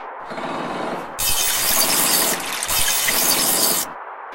JBF Alien Bar
Liquid in an alien environment, processed.